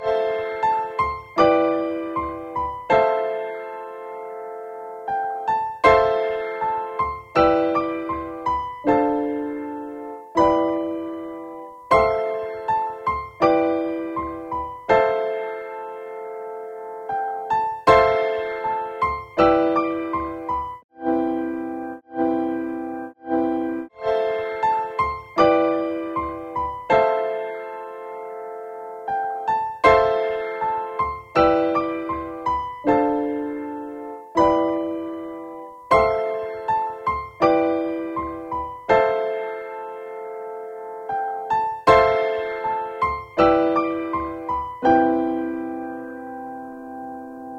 Lofi Piano Loop 80 BPM(2)
pack, piano